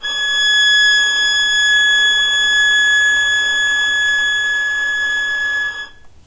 violin arco non vib G#5
violin arco non vibrato
arco, non, vibrato, violin